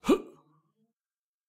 VOC Male Jump 02
A male voice (me) grunting while executing a jump. Recorded during a game jam.
breath, grunt, male, vocal, voice